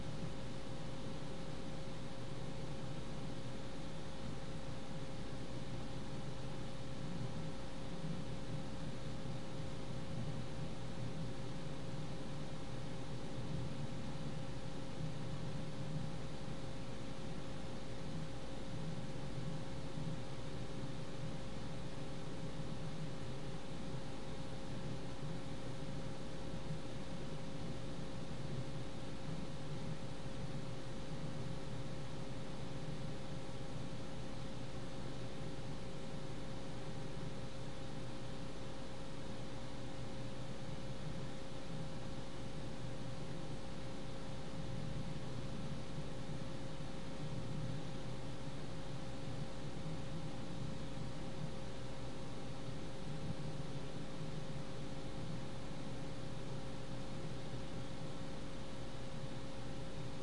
Computer Noises
This is the sound my desktop makes.
Recorded with a Pyle microphone and audacity.
background-sound, machine, ambience, ambiance, computer, Desktop, quiet, hum, fans, general-noise, Fan, background, ambient, white-noise